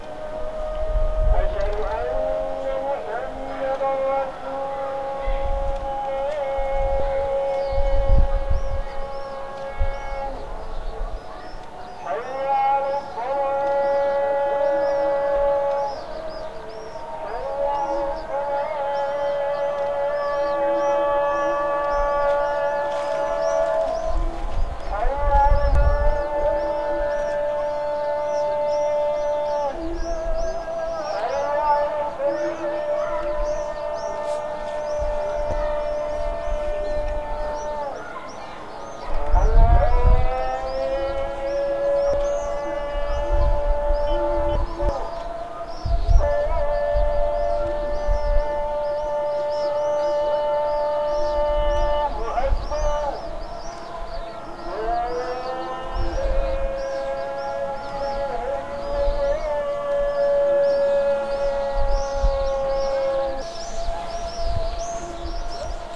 Recording from the rooftops in Marrakech, Morocco, of all the local mosques giving the evening call to prayer.
mosques, muezzin, islam, prayer, morocco